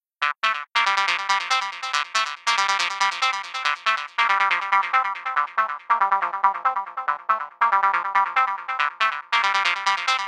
TR LOOP 0419
loop psy psy-trance psytrance trance goatrance goa-trance goa
goatrance, goa-trance, trance, psytrance, psy, goa, psy-trance, loop